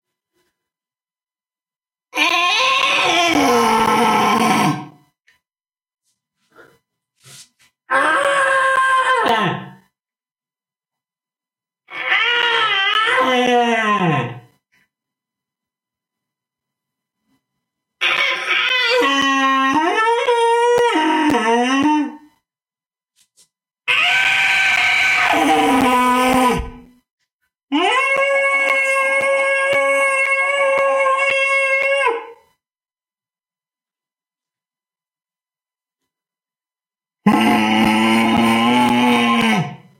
Dinosaur sounds
beast, dragon, groan, growl, horror, monster, scary, screaming, tyrex, zombie